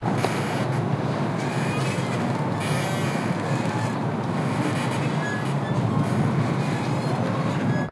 platform over the sea porto antico
Platform floating over the sea in the port of genova.